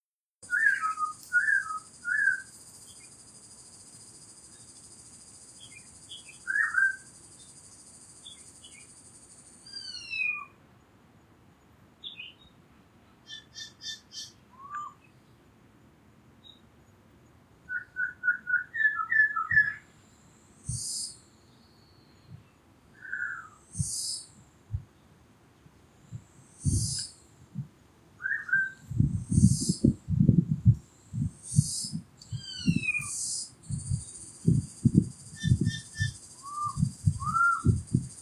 birds at the city.
birds, sing